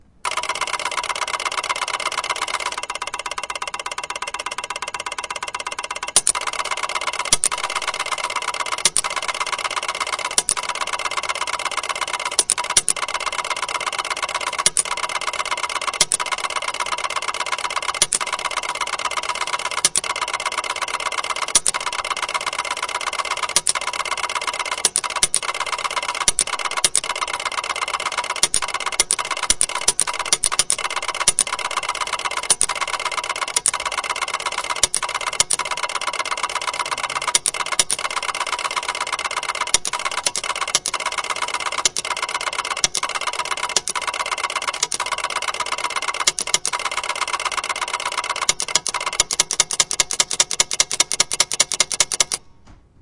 Ticker Tape Machine FF652
soft sporadic ticks interspersed in constant ticking
constant, soft, tape, ticks